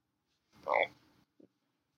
Frog croaking sound effect
foley, frog